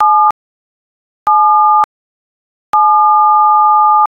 The 'B' key on a telephone keypad.
b, button, dial, dtmf, key, keypad, telephone, tones